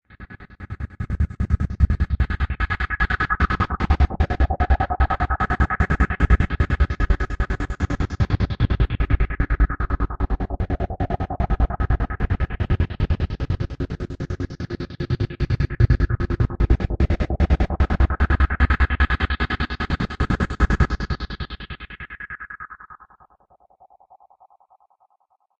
150 ahhh loop
I created this in Sony Acid with an ahh string from Alchemy VST, the sequenced beat-like ahh's were created by knocking the volume on and (nearly) off to a sequence that sounds great over beats. Created for the continuum 4 project which can be visited here:
loop, acid, 8bars, bars, 150, 150-bpm, 8, angels, sequenced, continuum4, ahh, volume, gate, 150bpm, continuum-4, sony, alchemy, strings, choir, bpm